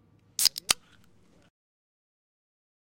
coca Cola
opening a can of coke